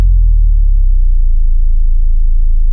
Sub Rush 2
bass, big, boom, cinematic, dark, design, low, rush, sample, sound, sub, tuned, wobble